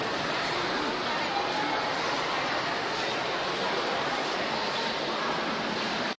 washington naturalhistory sealife3
Life of the Sea exhibit inside the Smithsonian Museum of Natural History recorded with DS-40 and edited in Wavosaur.
field-recording, natural-history-museum, road-trip, summer, travel, vacation, washington-dc